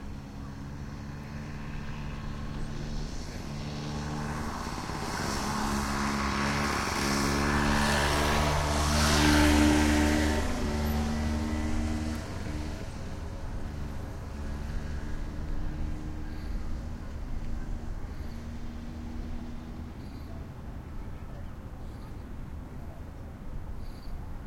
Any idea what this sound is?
scooter pass by medium speed echo off building
by, echo, medium, pass, scooter, speed